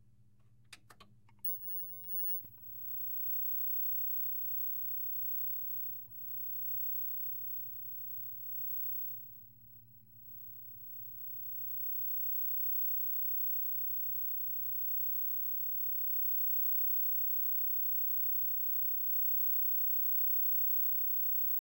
Tube TV Buzz
A tube TV turning on and emitting buzz.
tv, turning, hum, buzz, tube